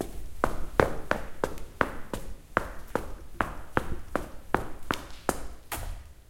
Running Loud
Someone is running on the floor.
step, feet, staircase, footsteps, steps, walk, stair, loud, foot, footstep, walking, stairs, stepping, run, running